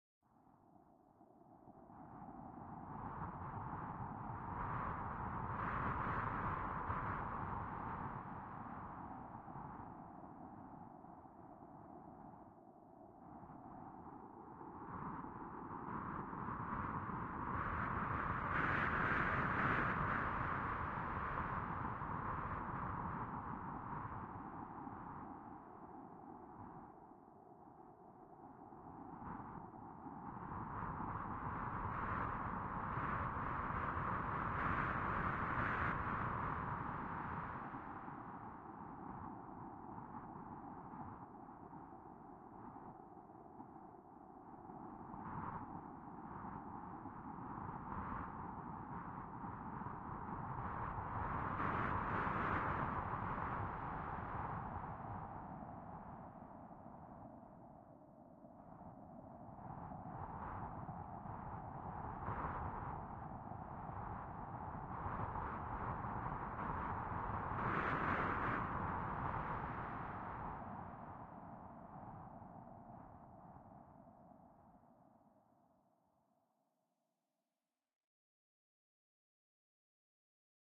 Wind - synth
blow, snowstorm, storm, wind